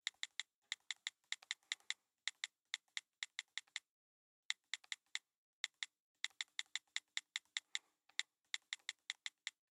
Mobile Phone Button Click iPhone
Button, Click, iPhone, MKH416, Mobile, Phone, preamp, sennheiser, shotgun-mic, studio-recording, UA, universal-audio